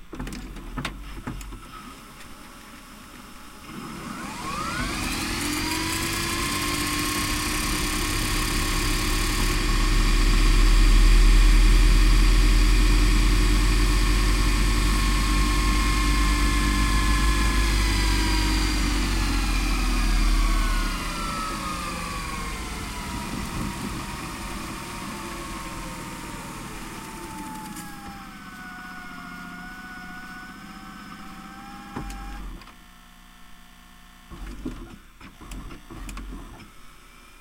Rewinding the tape in the VCR it slows down when it gets close to the end of the tape before stopping.Recorded with the built in mics on my Zoom H4 inside the tape door.
drone, motor, rewind, tape, transport, vcr, whir